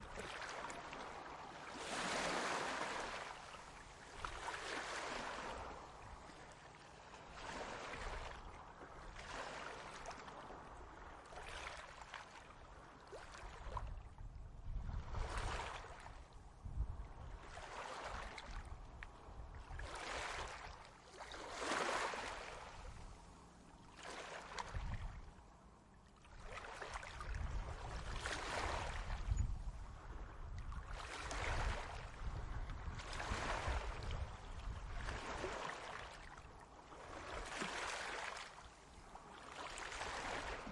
Calm Waves ambience
Calm Waves at a Danish Beach, the first day of summer. Recorded on the Zoom H6, with the M/S mic.
Lake, ambience, Summer, ocean, Denmark, Small-waves, Water, Nature, Waves, Beach, field-recording